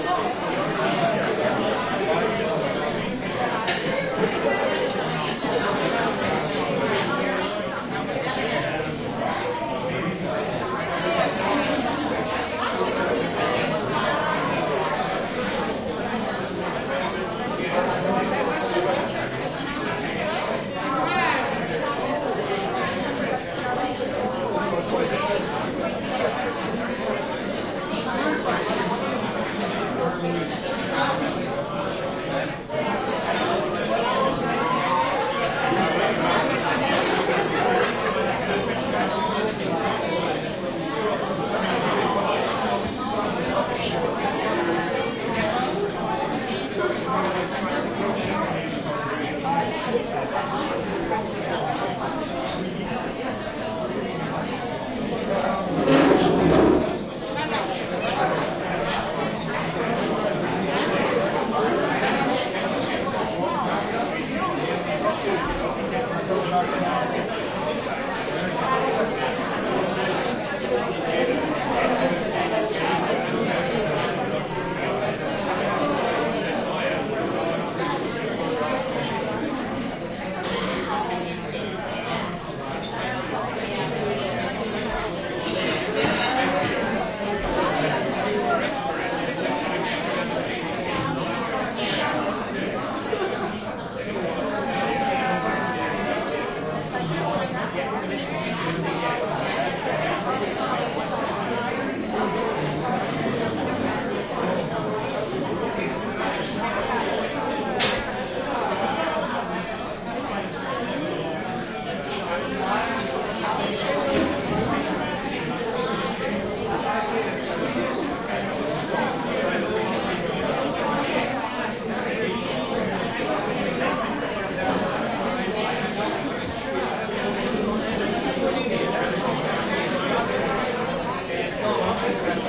crowd int medium active bar club NYC
active, bar, city, club, crowd, int, medium, new, NYC, york